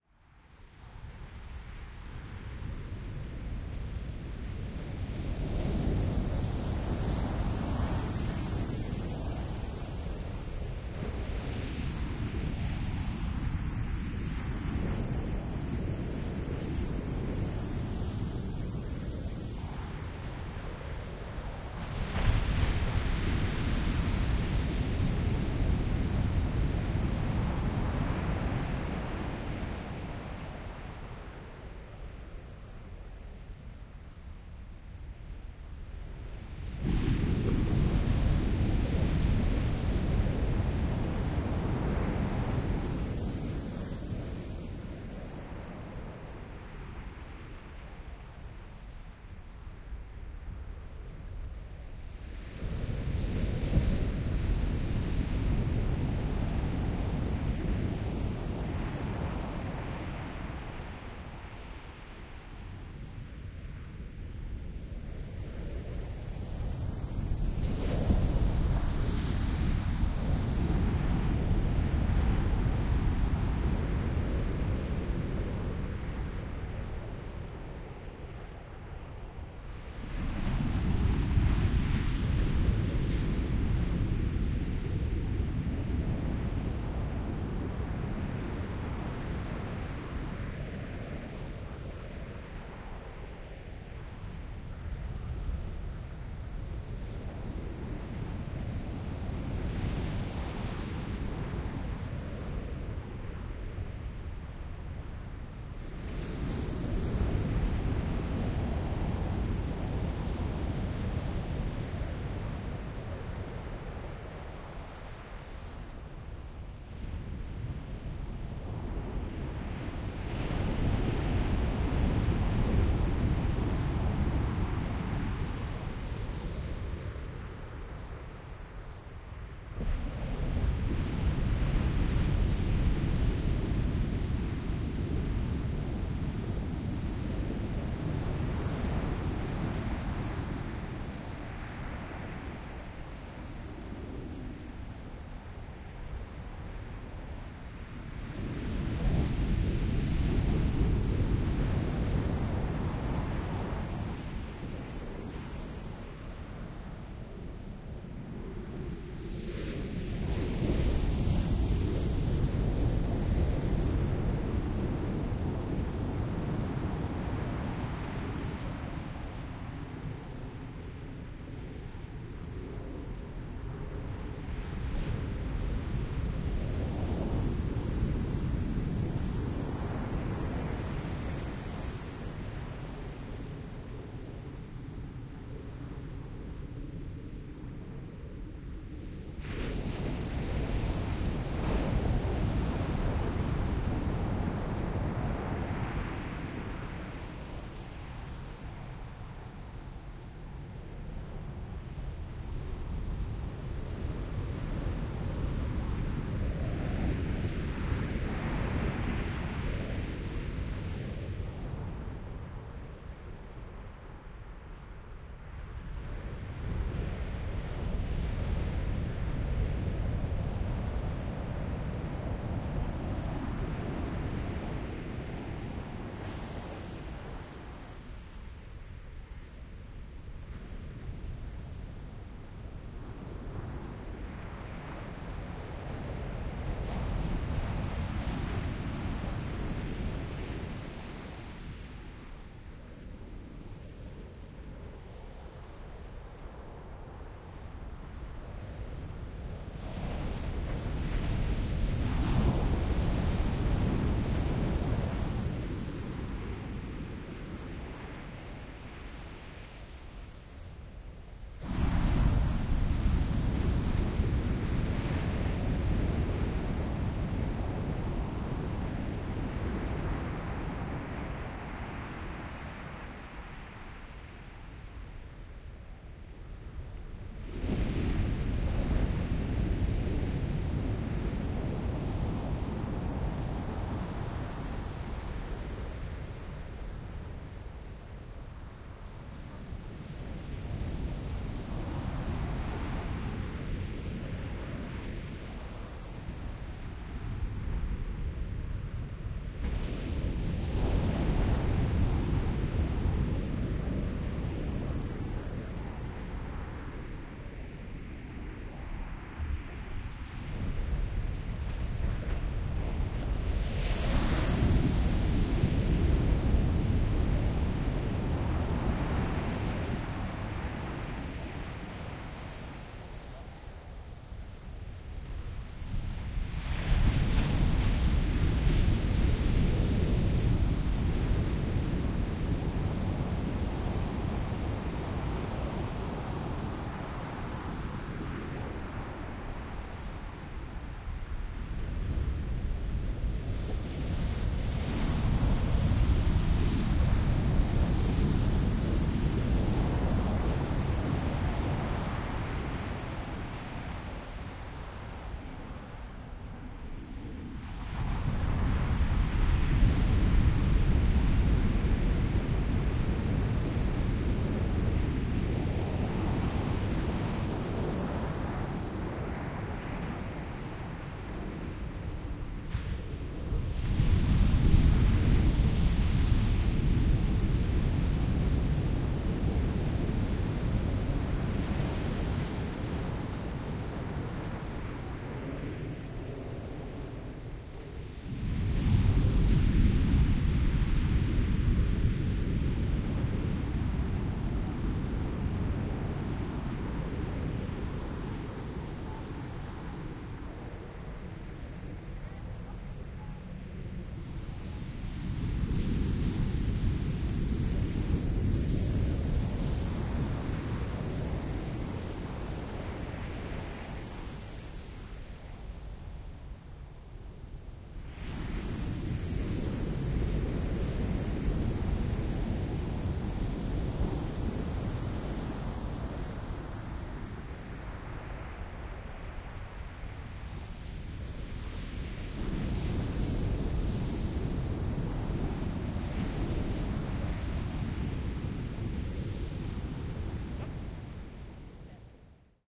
SoundField Ocean Take 1 Harpex Stereo 161205
General beach ambience with waves on sand, no human noise, stereo - recorded on 5 Dec 2016 at 1000 Steps Beach, CA, USA. - Recorded using this microphone & recorder: Soundfield ST350, Zoom F8 recorder; Format conversion and light editing done in ProTools.
beach, water, field-recording, waves, ocean